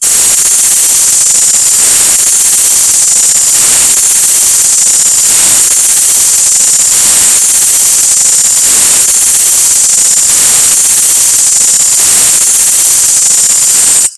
(WARNING HEADPHONE USERS!)
A horrible sound for a contest, created by scraping an mbira with a rusted knife, layering it and staggering it, before speeding it up and layering it again. I dread to think what use someone might have for this! It came 2nd place.
The sound was recorded using a "H1 Zoom V2 recorder".
Originally edited using Audacity and Paulstretch on 26th September 2016.